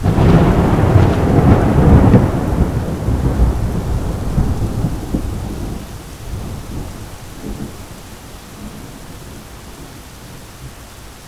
Thunder Powerful (mono)
Thunder clap captured from an open window in Boston... nice powerful spring storm!
Rode NT-1A pointed out a window... nothing fancy.
lightning, thunderstorm, weather